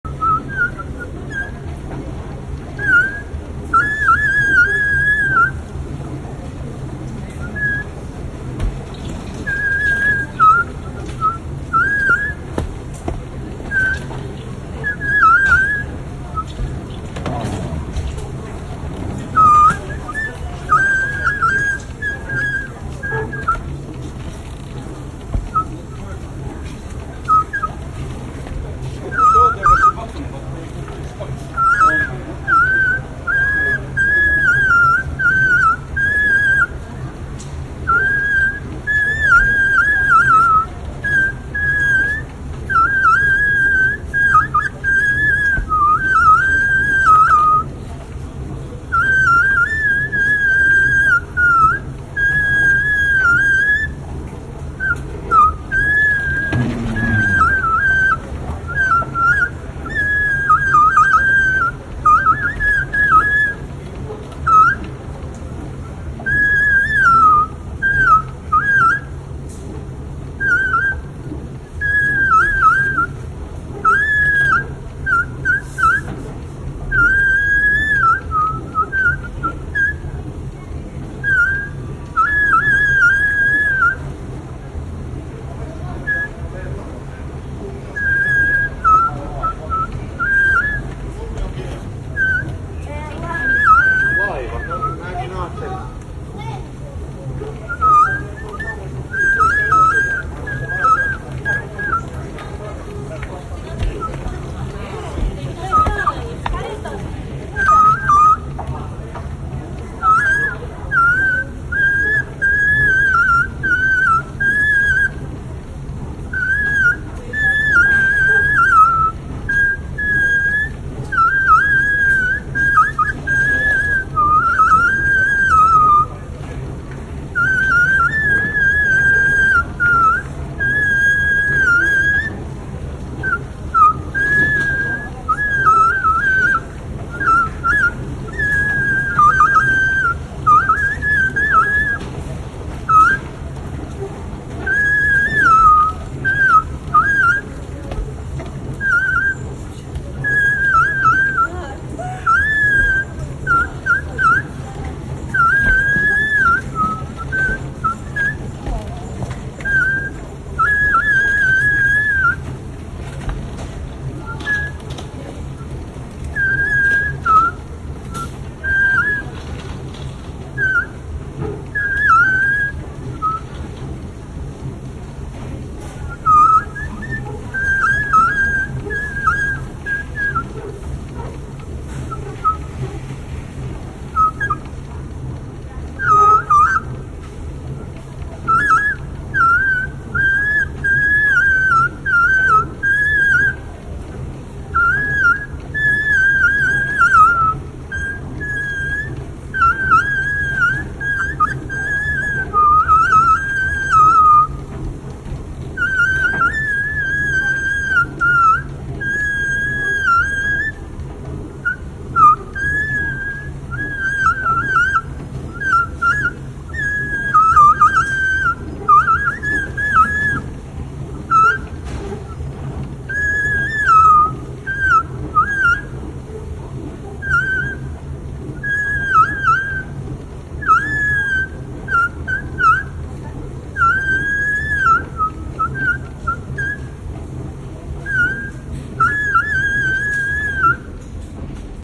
This is a recording of an escalator at the main bus station in Helsinki, Finland. I heard it making this sound one day. It was quite loud in the space and I heard if from far away. It sounded like a street performer playing an odd instrument. I imagined that a drummer would have had fun jamming along with this.
I missed the opportunity to record it that week, but luckily the thing wasn't fixed the next week when I was again at the same place.
This was recorded with iPhone Xr, so it's not super quality (I actually took a video really close to the escalator, hoping the video recording gives the best sound), but maybe somebody finds some use for this (the sound can likely be well isolated). This is as unprocessed as it can be, straight from the original video.